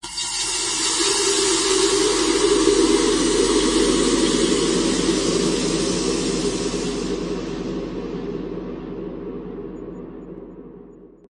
Something call to you
drama, evil, ghost, horror, horror-effects, horror-fx, monster, suspense, terrifying, terror, thrill